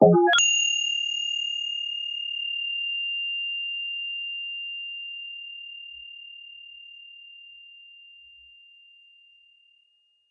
chin chin stretching 2
chin chin processed sample remix
chin-chin, stretching, transformation